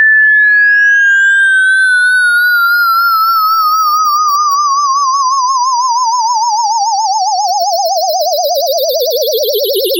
Generated with Cool Edit 96. Sounds like a UFO taking off...
multisample, mono